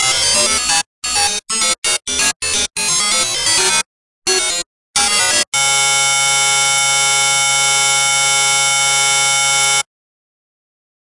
the second glitch made with fl studio 11